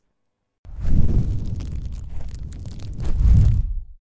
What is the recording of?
Light a torch and throws. Layered (clothes, plastic bag...). AKG2006 + Audacity.